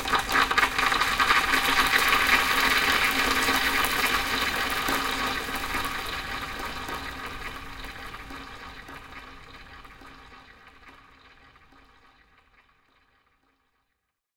dumping gravel down sink
recordings of a grand piano, undergoing abuse with dry ice on the strings
abuse, dry, ice, piano, scratch, screech, torture